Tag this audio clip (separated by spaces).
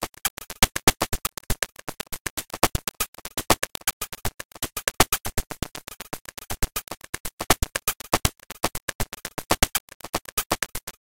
Hourglass percussion 120bpm glitch 120-bpm loop